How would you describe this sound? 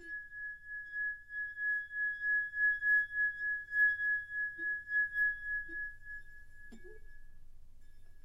Crystal bowl Ab4 1
A slightly wet crystal bowl rubbed with my finger. Tuned in Ab4. Taça de cristal úmida esfregada com o dedo . Afinada em Ab4